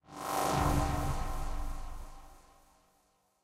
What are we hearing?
UFO Sound Effect
alien, fuzzy, UFO, effect, craft, sound